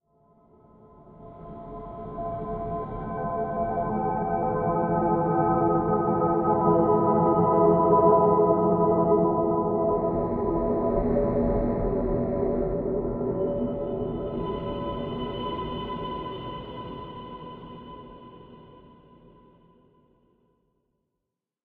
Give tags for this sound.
suspenso experimental dark oscuro tenebroso flims atmo ambient

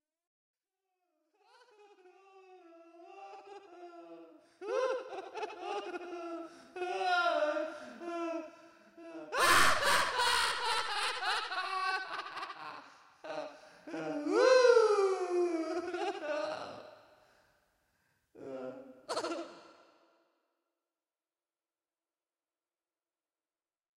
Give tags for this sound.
crazy hurt laughing loud pain

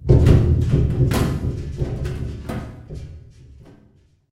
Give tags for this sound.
air climb dark duct echo metal reverb rustle slam ventilation

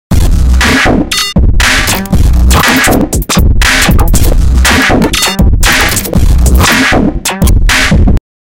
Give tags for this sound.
loop sample DJ funky VirtualDJ samples

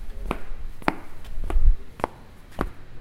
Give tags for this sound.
UPF-CS13 steps walking campus-upf

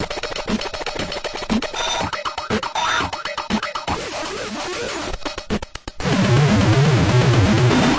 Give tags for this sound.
Phasor Drumloop Phaser Effects-Pedal Beat Filter Circuit-bent

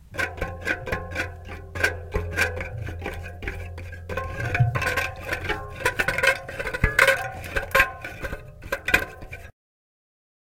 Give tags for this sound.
bicycle bike fingers spinning spinning-tire spokes tire

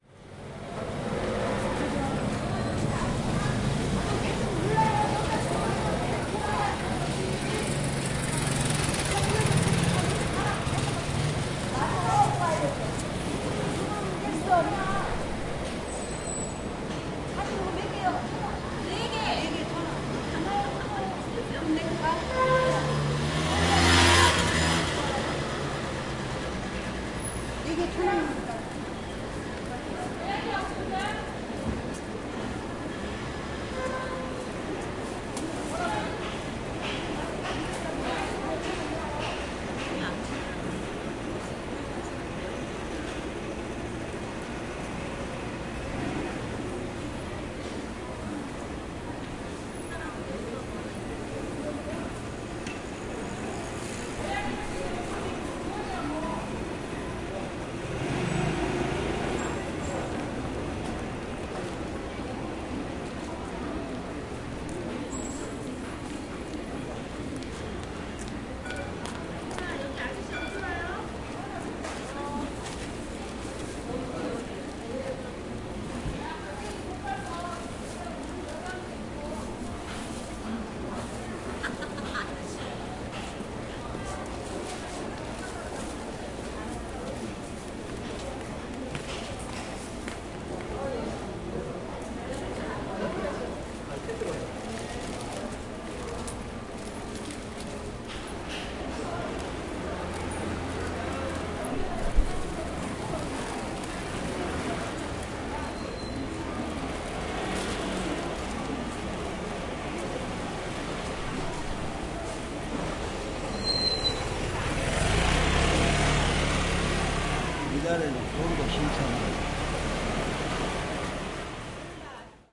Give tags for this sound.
voice seller field-recording korea seoul motorbike korean market

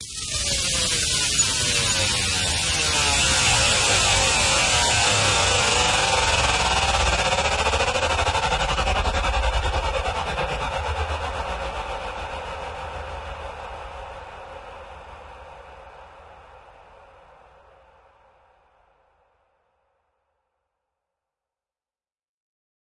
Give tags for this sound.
Stretch Median Pyscho

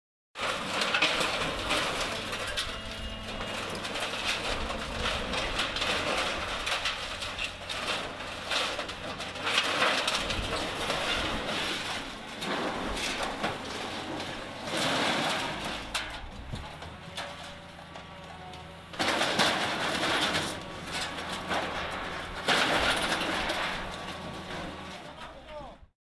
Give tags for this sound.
carwash industrial

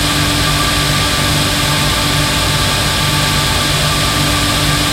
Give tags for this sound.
Atmospheric Freeze Perpetual Still

